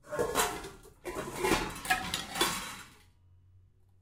pots n pans 05
pots and pans banging around in a kitchen
recorded on 10 September 2009 using a Zoom H4 recorder
pans, kitchen, rummaging, pots